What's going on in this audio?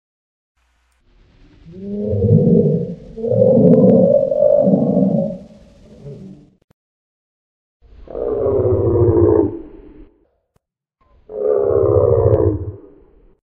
Stegosaurus calls based on cretaceous syrinx information and hypothesis.
movie, recreation